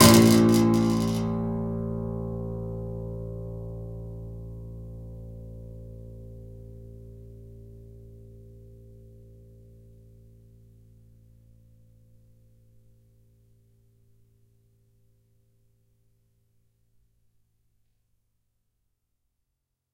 A plastic ruler stuck in piano strings recorded with Tascam DP008.
Une règle en plastique coincée dans les cordes graves du piano captée avec le flamboyant Tascam DP008.
piano, detuned, prepared